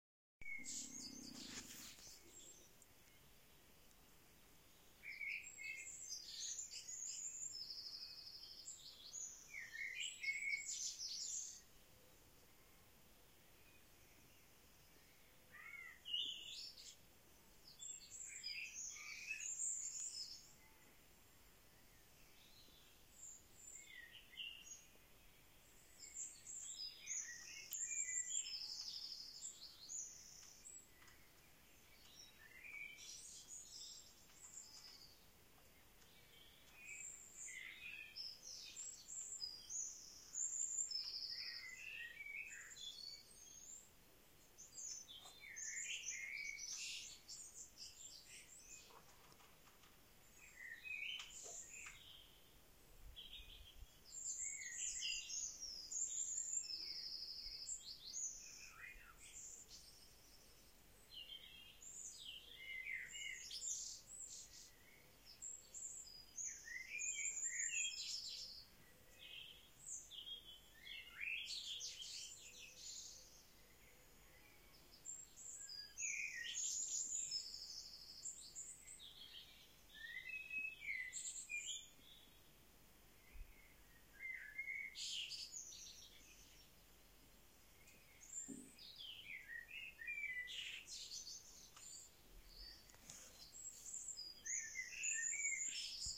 Dawn Chorus recorded in Wicklow, Ireland.